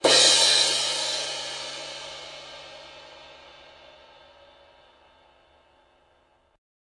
03 Crash Loud Cymbals & Snares
turks, drumset, drum, Bosphorus, custom, TRX, one